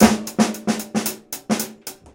loop snares+hat
a percussion sample from a recording session using Will Vinton's studio drum set.